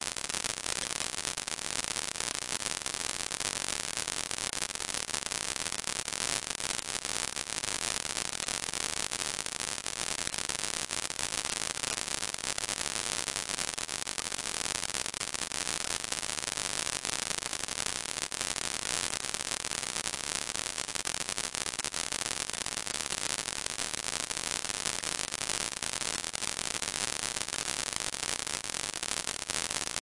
34 Dust Density 100
This kind of noise consists of a certain number of random values per second. This number is the density. In this example there are 100 random values per second.The algorithm for this noise was created two years ago by myself in C++, as an imitation of noise generators in SuperCollider 2.
density, digital, dust, noise